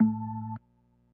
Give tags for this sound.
a3; note; organ

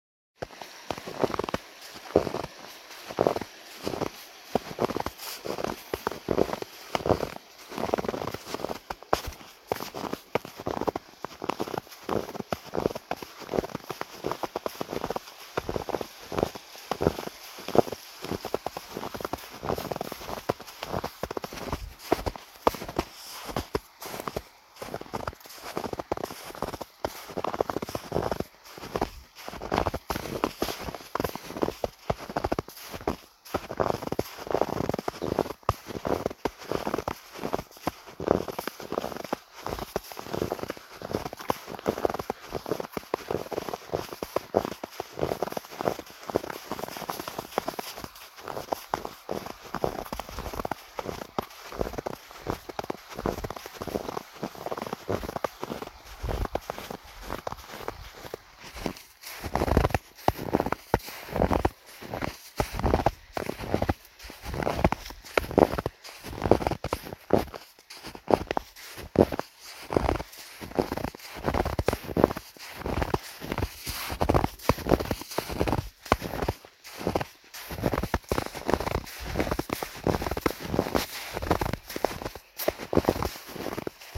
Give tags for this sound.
feet; foot; footstep; footsteps; running; snow; sound; step; steps; walk; walking; winter

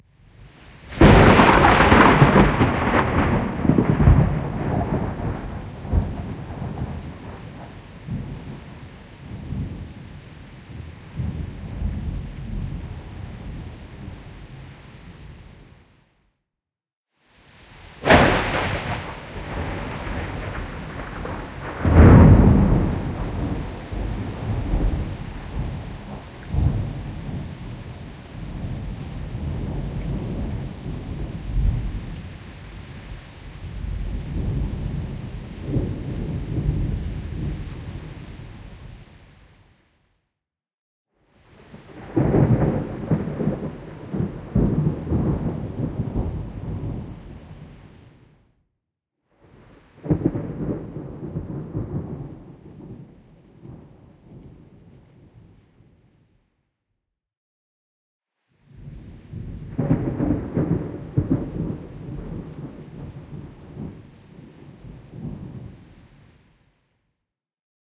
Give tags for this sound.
lightning; nature; rainstorm; severe; thunderstorm

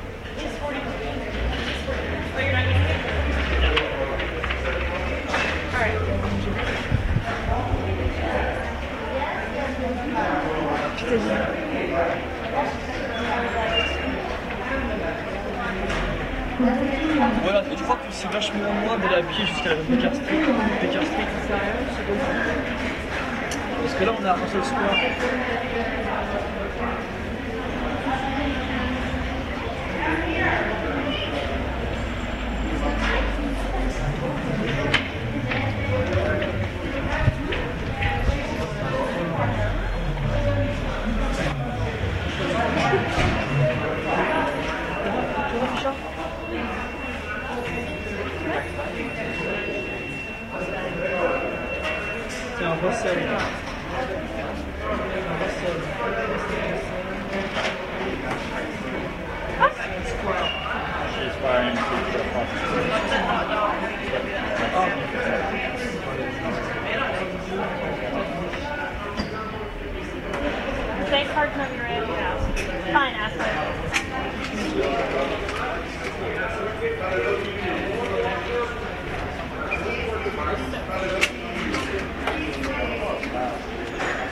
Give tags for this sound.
london-underground; speech; voice; field-recording; london; ambience

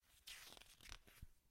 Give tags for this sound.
page-turn; pages

